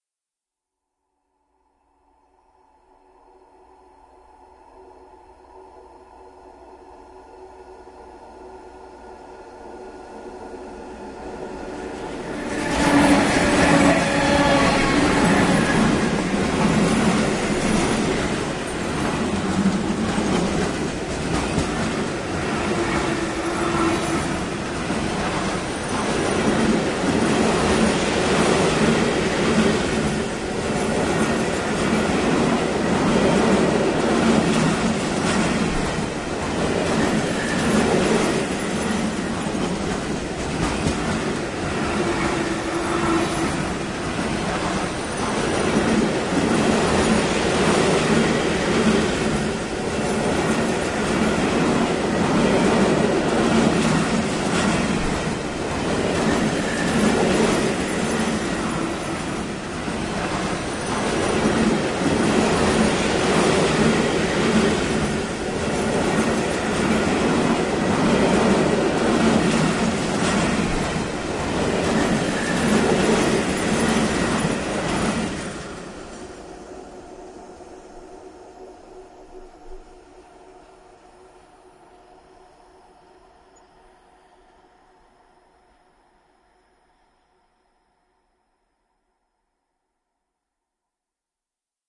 nákladní vlak 4 dlouhý
goods train 4, long
around, railroad, ride, train